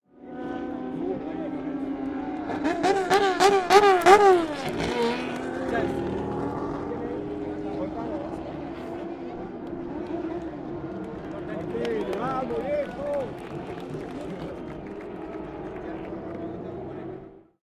TC.Salta.08.RevvingUp.GuilleOrtelli
engine, field-recording, crowd, zoomh4, race, car, turismo-carretera, revving, sound